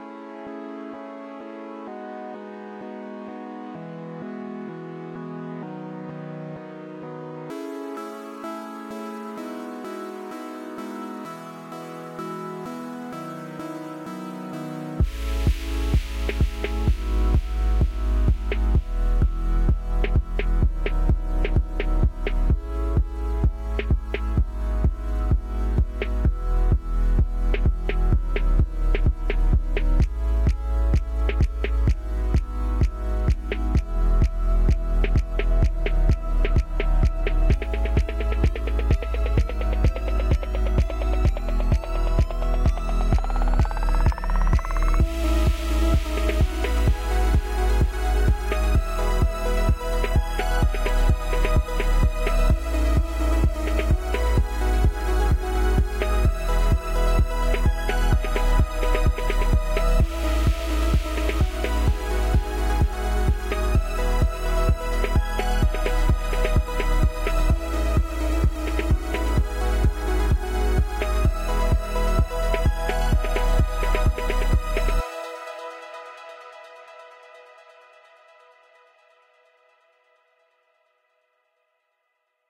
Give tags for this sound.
Chill; Dance; EDM; Electronic; Music

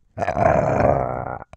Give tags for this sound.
snorting; slurping; monster; voiceover; voice; non-verbal; growling; beast; vocal; grunting; human; creature

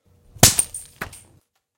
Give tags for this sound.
break; breaking; chain-snapping; crack; fire-works; rope; rope-snapping; snap; Snapping; snapping-chain

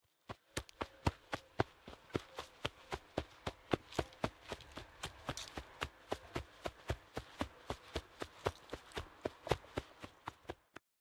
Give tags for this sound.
CZ; Czech; fast; footstep; footsteps; man; Pansk; Panska; step; steps; walk; walking

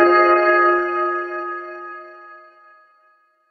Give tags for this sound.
harp; percussion; transformation

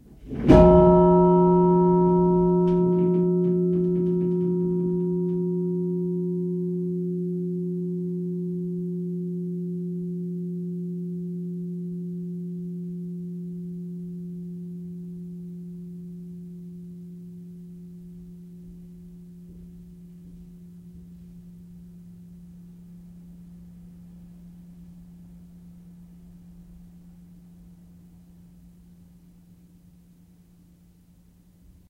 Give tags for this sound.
church; ring; bell

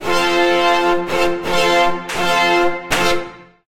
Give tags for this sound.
final-boss; arcade; games; console; video-games

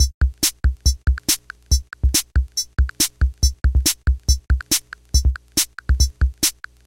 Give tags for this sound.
140; Boss; BPM; Dr70; drum; kick; loop; noise; snare

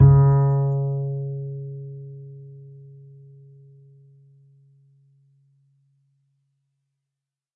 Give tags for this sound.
Plucked; Standup; Stereo; Bass; Acoustic; Double; Instrument; Upright

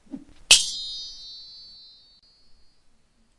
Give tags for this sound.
Knight; Weapon; Ting; Sword; Clash; Hit; Medieval; Swing

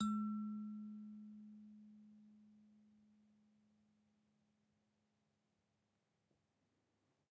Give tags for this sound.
short; pitch; sound; note; nature; unprocessed; ab; african; kalimba

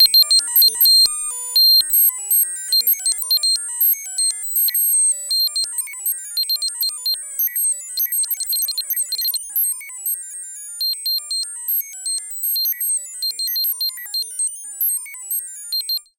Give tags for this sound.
blip; computer; sound

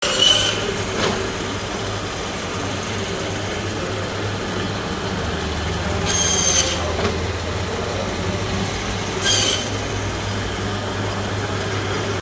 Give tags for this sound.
butcher; electric-saw; bone; meat

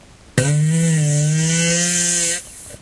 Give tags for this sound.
flatulence; fart

